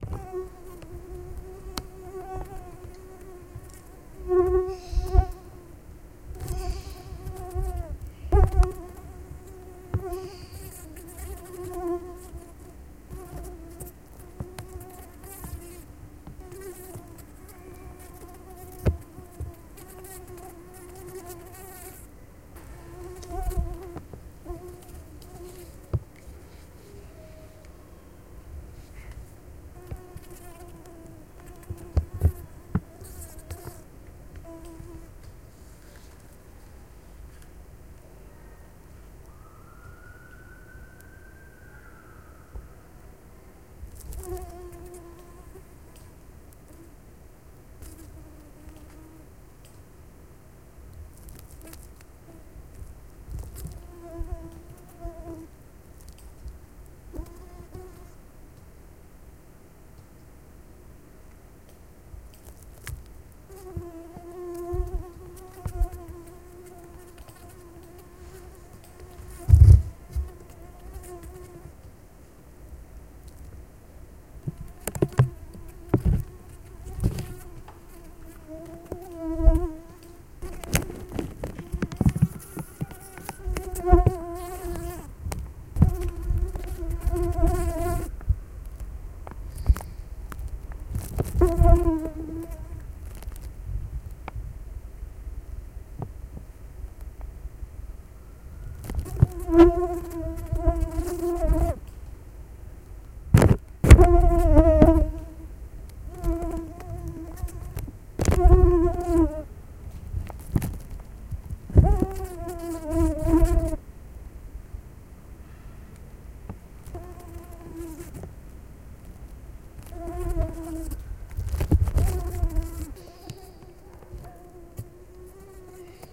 Mosquito Buzzing
A real mosquito trapped inside a plastic bag, flying around. Sometimes you can hear the bag crackling because I had to poke it so the mosquito would fly.
Recorded with a Tascam DR-40 at Mexico City.
buzz, buzzing, flying, home-recording, insect, mosquito, real